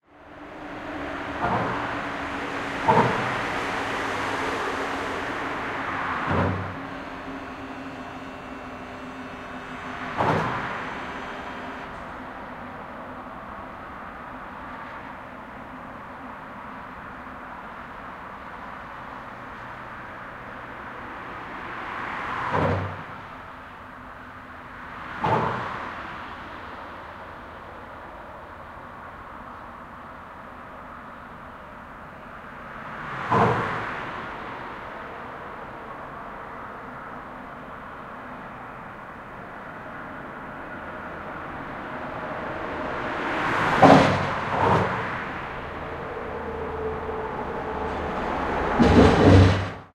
18092014 s3 under flyover 001
Fieldrecording made during field pilot reseach (Moving modernization
project conducted in the Department of Ethnology and Cultural
Anthropology at Adam Mickiewicz University in Poznan by Agata Stanisz and Waldemar Kuligowski). Under the S3 flyover in Świebodzin (on the crossroad with the national road no. 92).
street, traffic, poland, road, flyover, fieldrecording, wiebodzin, noise, car, lubusz